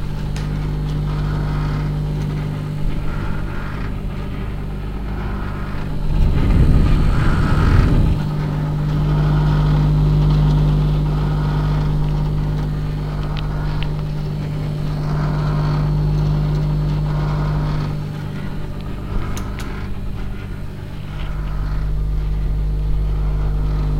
A microwave turned on.
Recorded with Sony TCD D10 PRO II & Sennheiser MD21U.
static, microwave, interference